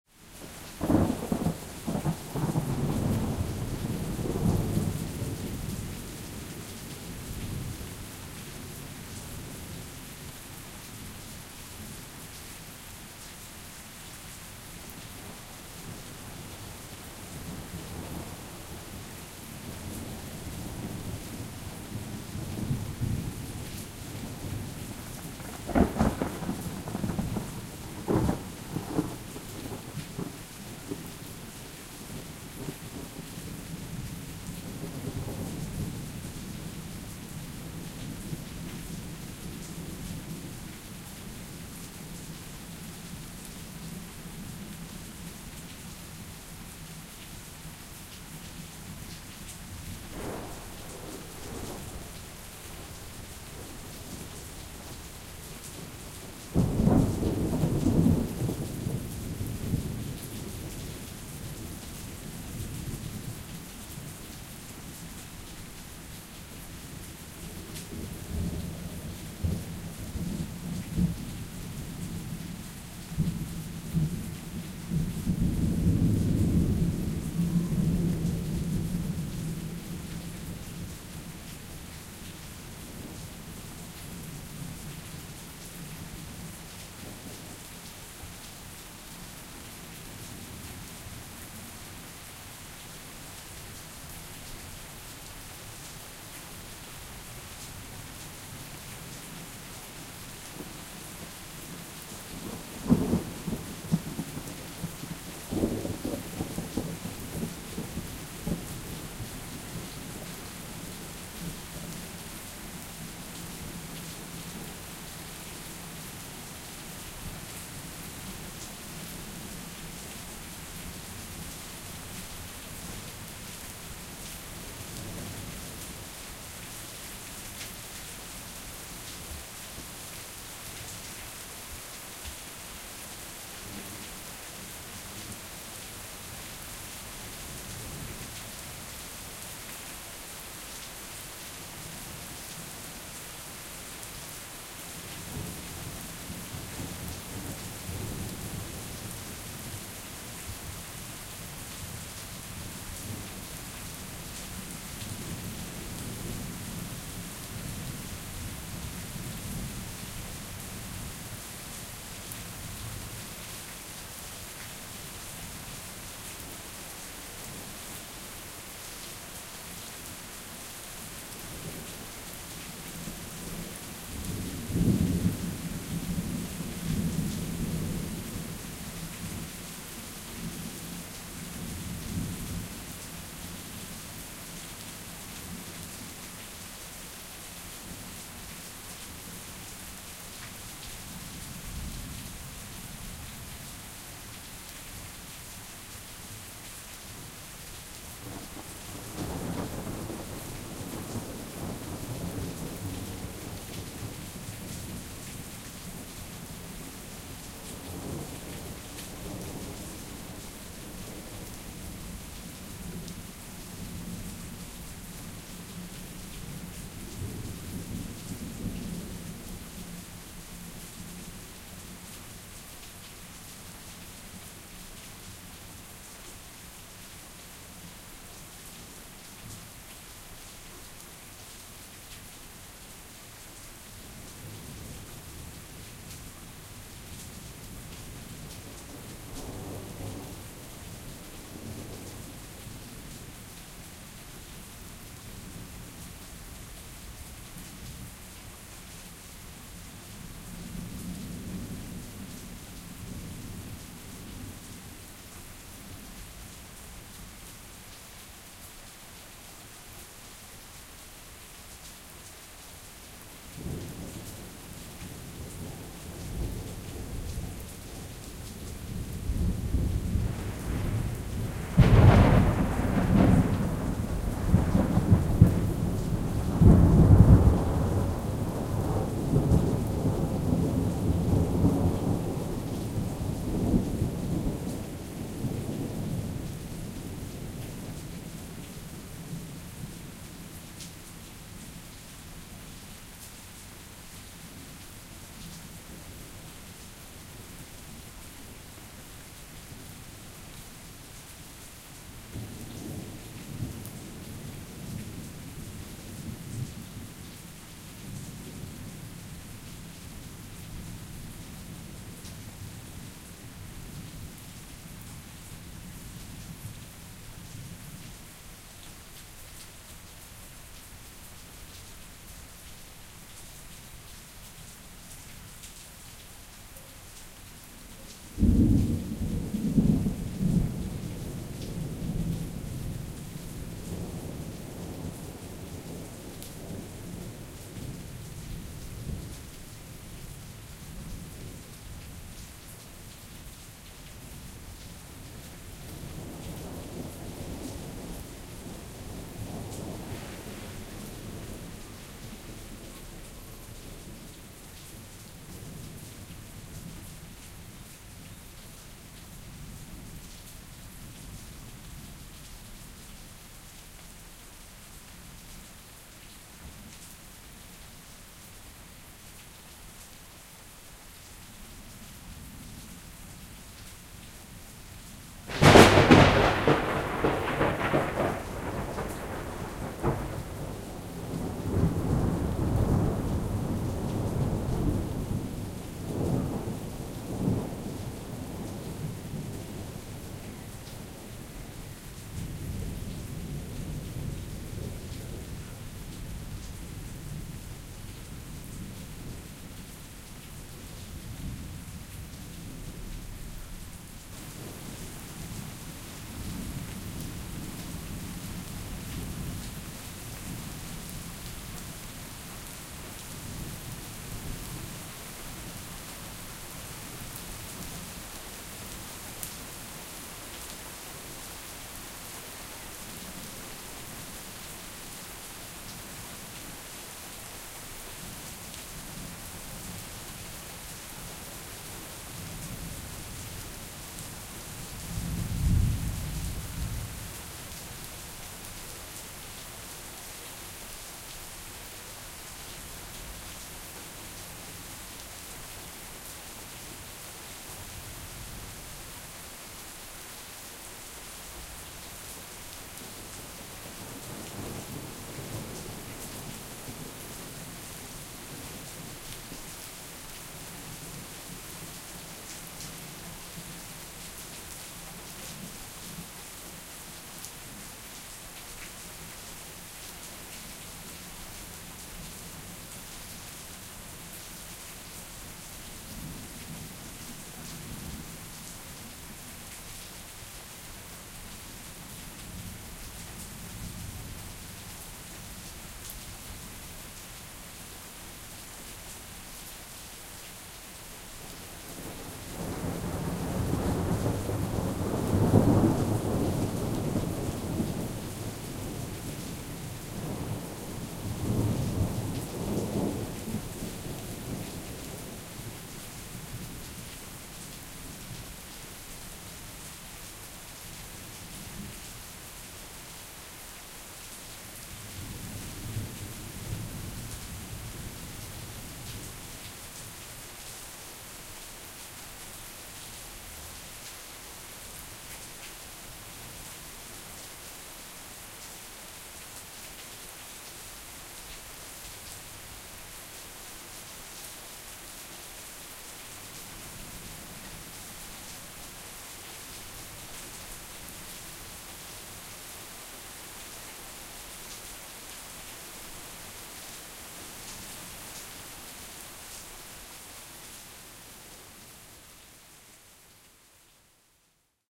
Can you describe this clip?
Thunder storm
Thunder in suburban Melbourne, light rain. A wide variety of different strikes, good for drama, easy to EQ for different emphasis. One massive overhead strike about 6:15 in.
rain, storm, thunder, weather